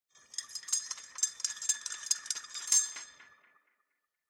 spoon on cup transformation - excited
Transformation 3 of the sound of a spoon stirring in a cup
alert, cup, excited, spoon